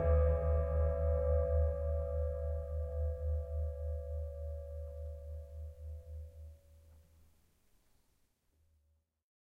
Couv MŽtal Mid
household, percussion